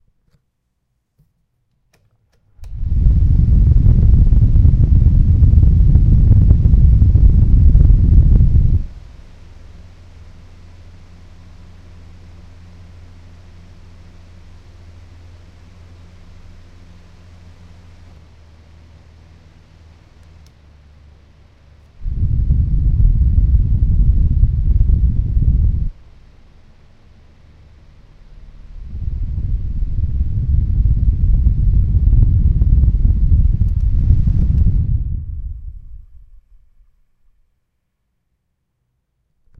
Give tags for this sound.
splatter-guard zoom-h2 wind h2 air filter breath fan ventilator zoom noise breeze storm pd

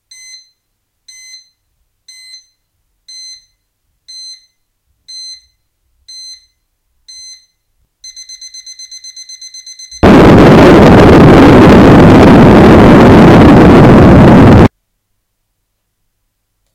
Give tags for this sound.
Explosion War

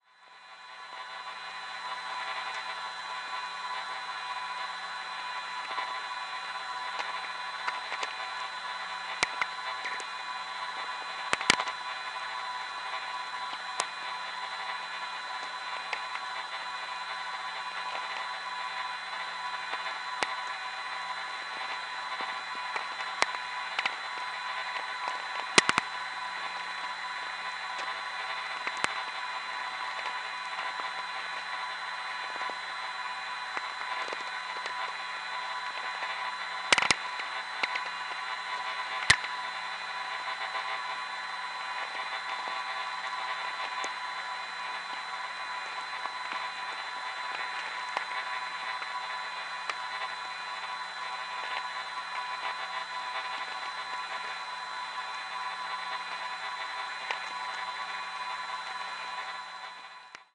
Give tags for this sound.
static shortwave vlf radio noise electronic

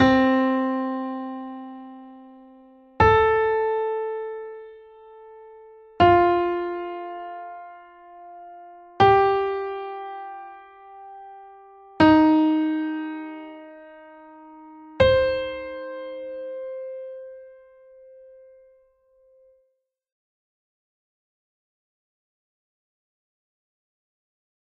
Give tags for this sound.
second aural pentacle symmetry

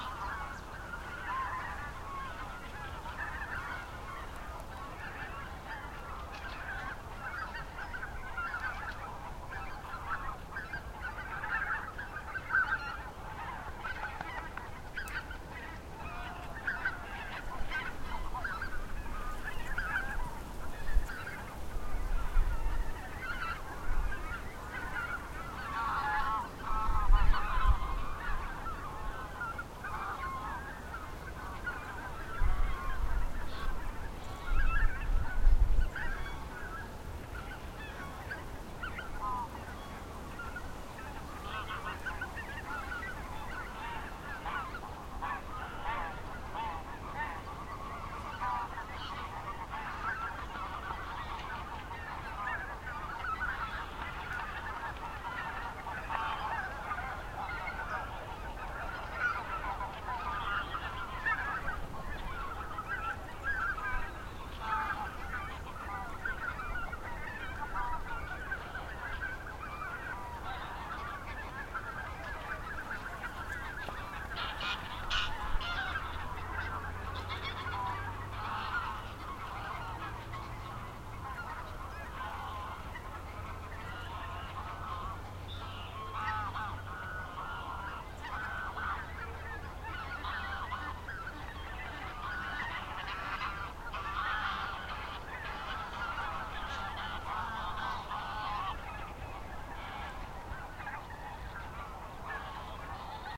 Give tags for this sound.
field-recording winter

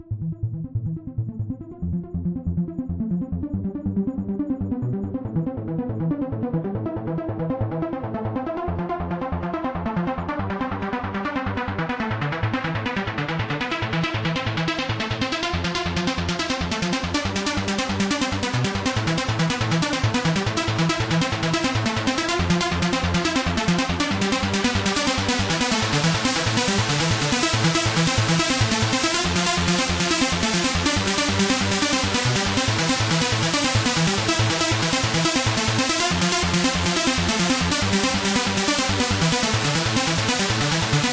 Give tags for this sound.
arp trance

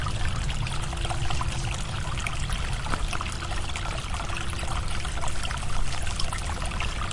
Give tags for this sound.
aigua Deltasona elprat elpratdellobregat nature river water

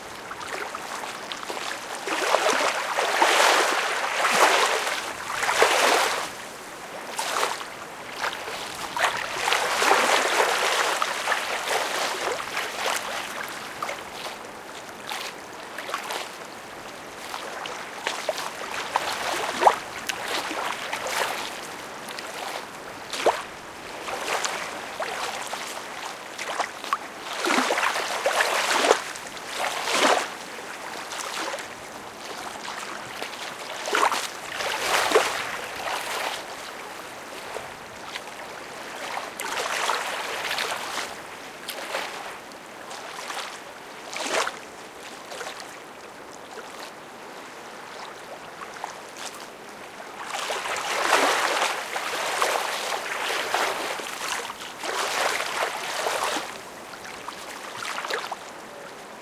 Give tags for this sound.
ambiance autumn field-recording marshes nature water waves wind

